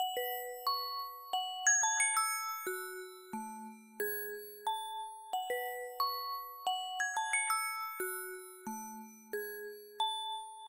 bells made with sine waves
bell, synth, bell-sounds